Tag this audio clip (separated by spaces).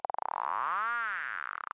Psy sci-fi synth psychedelic psytrance synthesis sound-design effect fx digital noise sfx